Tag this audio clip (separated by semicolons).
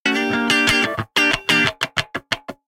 sample; electric; funk; rock; guitar